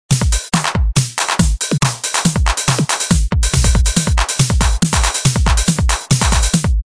Cool beat 1
Just a short thing I crested in Fl Studio 8 (Demo version) Yes, it does loop. I hope you all like this sound.